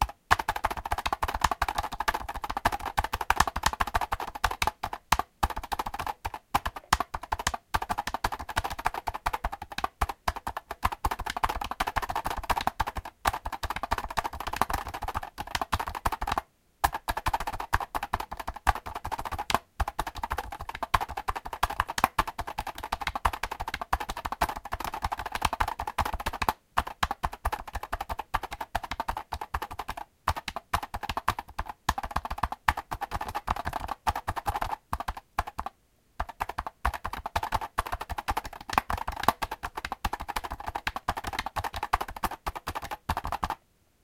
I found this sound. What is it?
Braille'n speak is a notetaker for blind people with Braille input and speech output. The development was discontinued. Here is an example how it sounds when someone is typing on it. Recorded with Zoom H1.